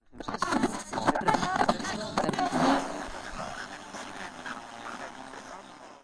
Recording of how the tunnig affects the sound on a radio AIWA FR-C12 recorded in a small studio room.
Sound recorded with Zoom H2